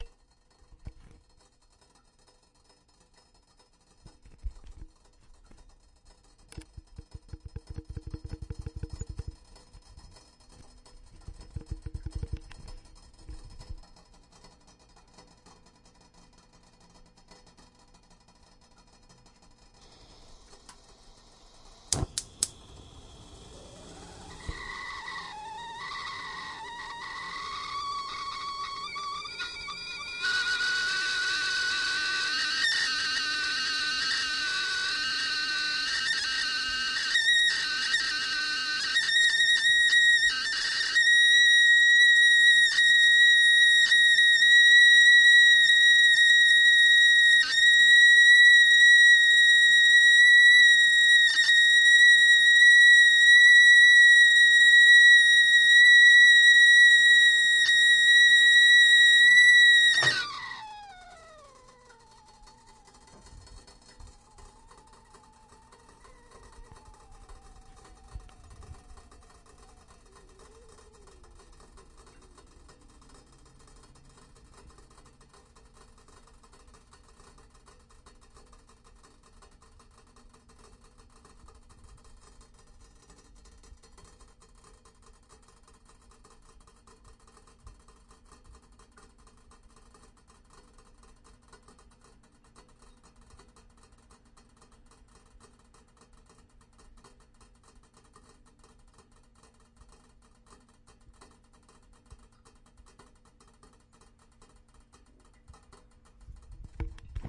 Recorded my kettle in the kitchen. Nice whistle and crackling. Recorded with Zoom H1.